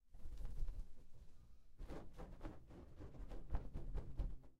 different rhythms of beating of the wings.
bird, flaping, wings